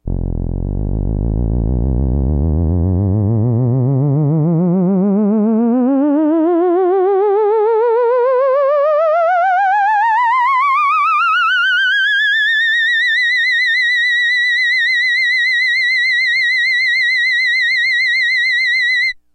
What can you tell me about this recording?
Mono. Dry. Classic theremin SciFi sound - full range sweeping soundbeam from low to high. Recorded dry so you can add the effects you wish.